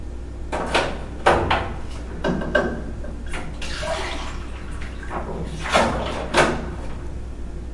Ambient sound water
ambient, field-recording, sound, water